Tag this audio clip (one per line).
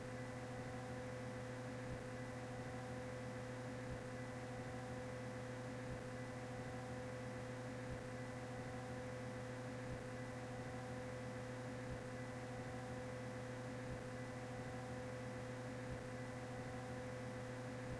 Mechanical
Elevator
Generator
Hum
Engine